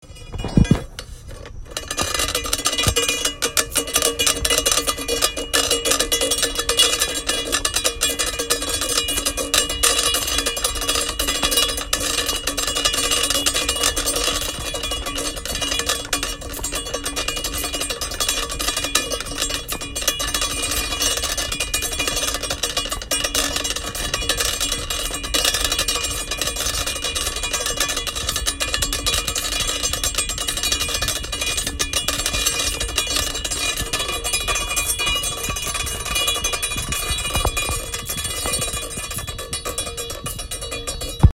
Dragging a Fire Poker 2
A fire poker being dragged along a busy street.
scratching, grinding, pulling, brush, scratch, push, grind, scraped, file, brushing, scrape, filing, scraping, pushing, fire-poker, pull, drag